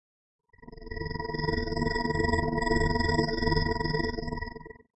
Ghost burping digitally.
element, image, soundscape, synth